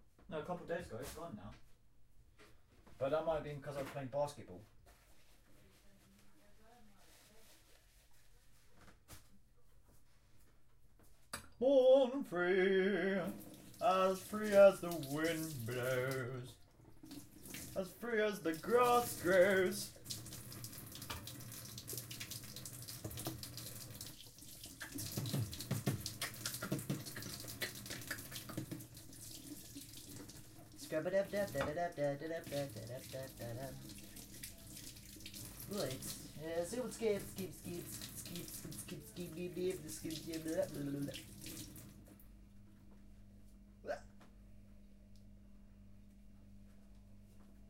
Myself in the kitchen, talking random stuff whilst washing a kitchen utensil.
(Recorded on ZOOM H1)
talking, singing, cooking, sink, washing